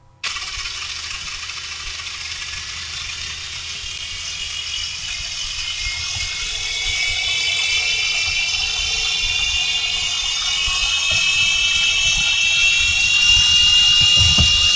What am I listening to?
Engine Startup
I made this sound with my remote-controlled helicopter, a cheap microphone, and Audacity.
podracer, car, plane, pod-racer, engine, remote-control, startup, helicopter, star-wars